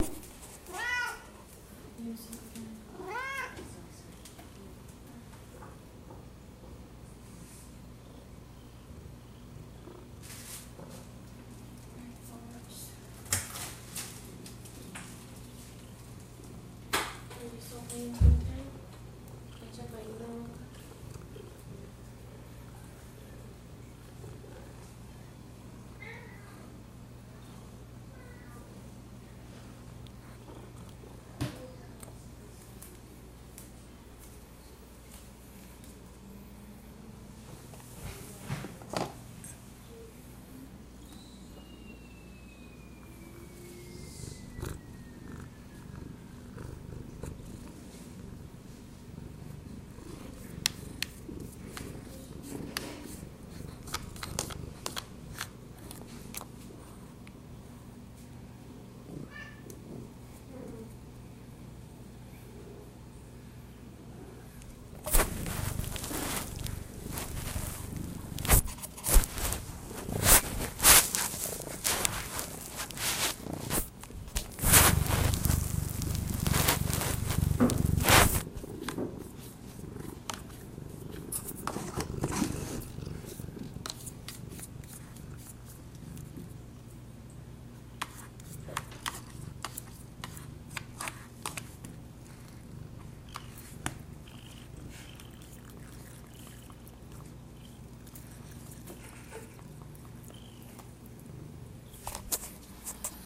Raw unedited recording of me trying to record a cat with a DS-40.